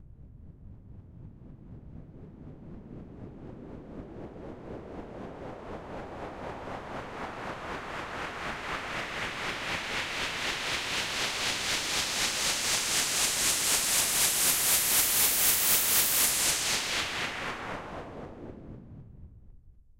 Sweep (Ducking fast)
A white noise sweep ran through a compressor sidechain.